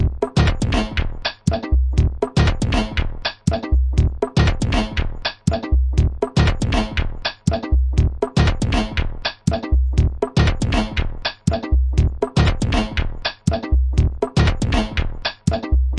tropical waste
percussion, harsh, industrial, techno, loop, beat, minimal